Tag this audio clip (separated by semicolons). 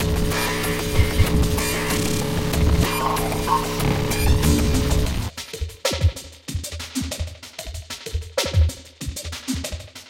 ambeint; soundscape; experimental